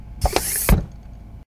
Chair Hydraulic 2
The hydraulic from an office chair.
hydraulic, chair